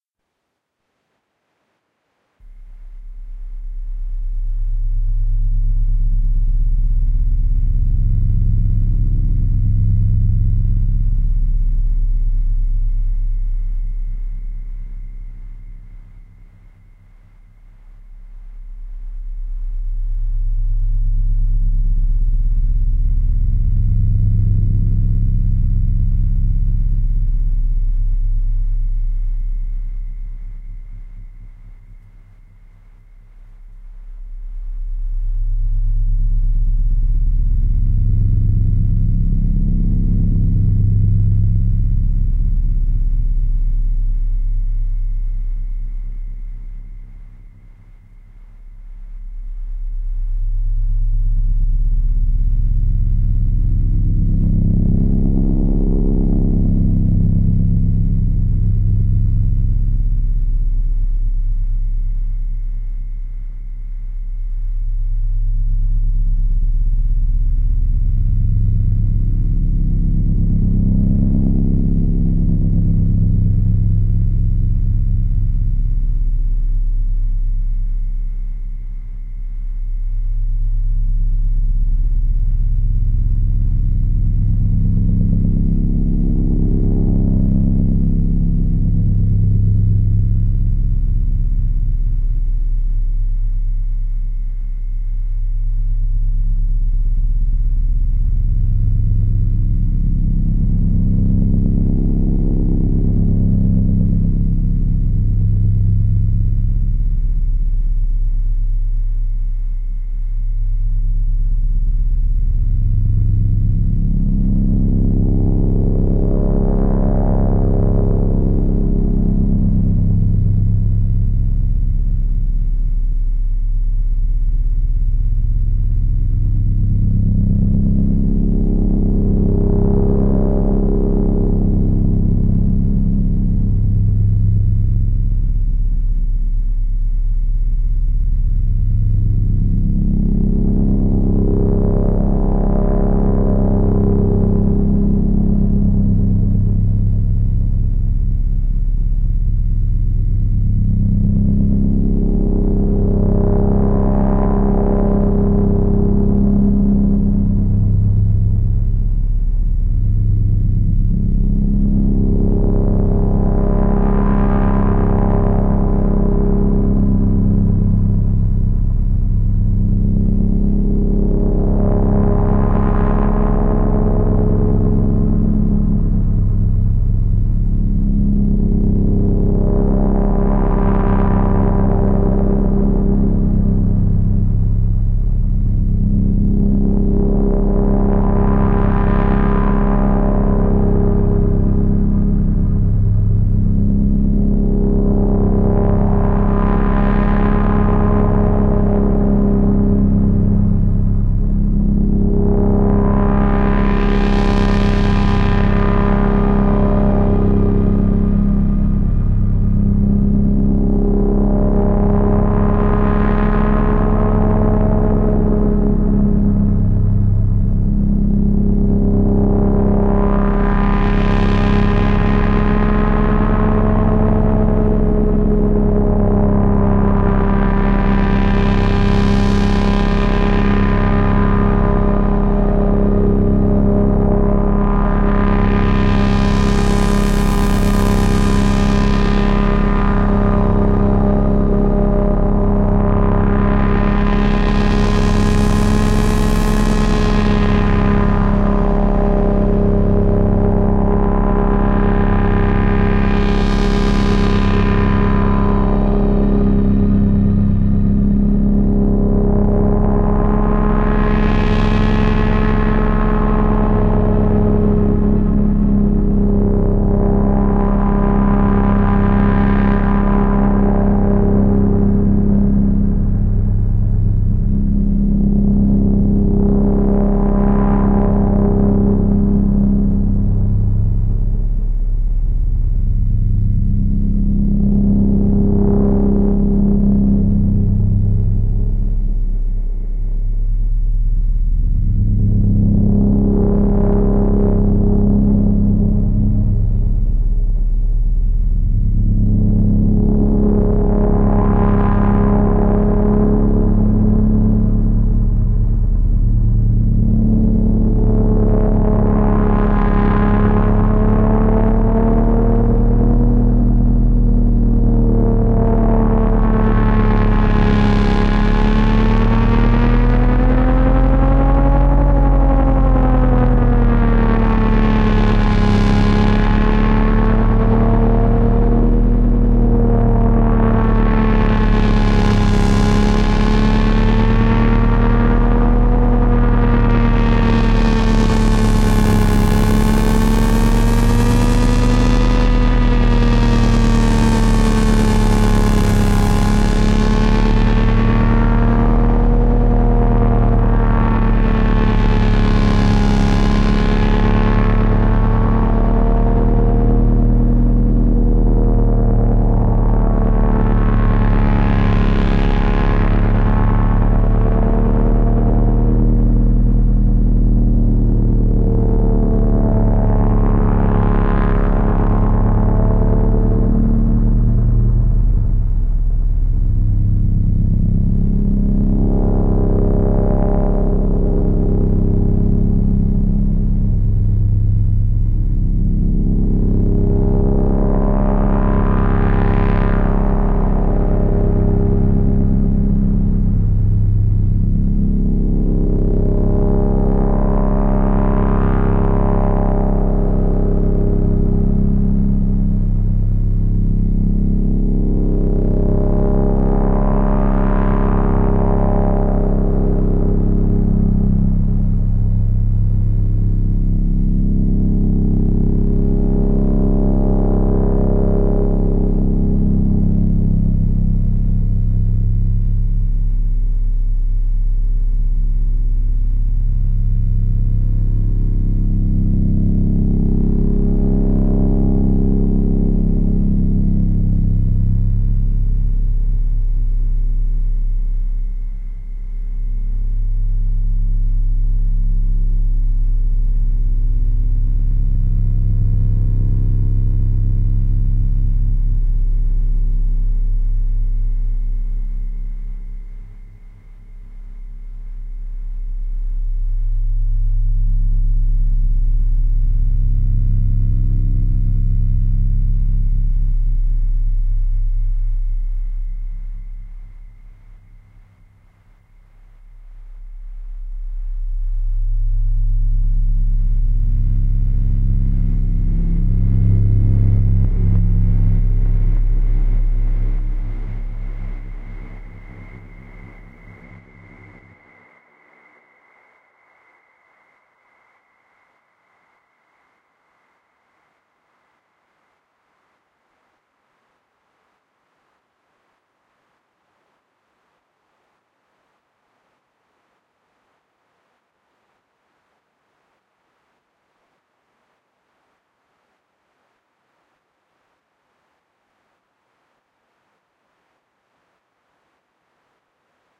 Dark Analog Drone
Dark drone sound i made on a my Behringer Model D analog synthesizer. Recorded trough my Soundcraft FX16II mixer. Effects come from external pedals (Zoom CDR 70, and Line6 echopark, from the lexicon process . Some processing was done later in Adobe Audition to finalize this sound.
ambient, buzz, dark, delay, drone, echo, industrial, LFO, machinery, mechanical, noise, oscillator, pulsing, reverb, robot, soundscape